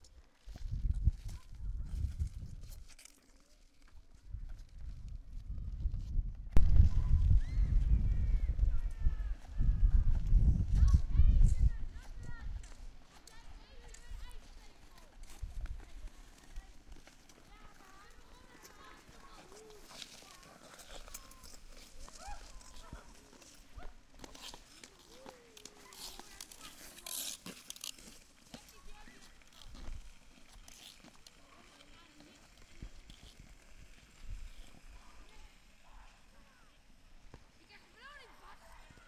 Ice rink skating in februari 2012 on a sunny day. Multiple recordings of skaters passing by. Unexperienced children as well as semi-pros can be heard passing by from right to left. recorded in Annen, the netherlands with a zoom H2 recorder
Annen, Ice, skating